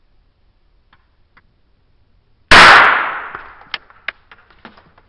Bomb kl
A loud explosion. made by popping a little trick noisemaker by the mic and slowing it down
bang, bomb, boom, explosion, loud